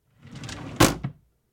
Drawer-Wooden-Empty-Open-02
This sound was captured from a small bed side dresser. I emptied the drawer before recording to get a more resonant sound. When it was full of socks it had a very dead and quiet sound that would be relatively easy to imitate through some clever EQing.
Drawer, Empty, Open, Wood, Wooden